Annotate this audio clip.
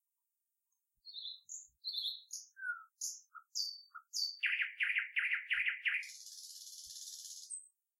Little bird tweets.
If you enjoyed the sound, please STAR, COMMENT, SPREAD THE WORD!🗣 It really helps!
birds, birdsong, field-recording, forest, nature, nightingale, sing, song, summer, tweeting